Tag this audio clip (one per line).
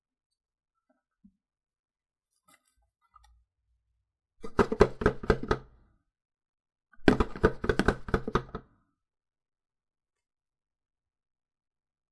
box
shaking
foley